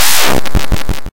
This was generated with the SFXR program. Here for project developing pleasure.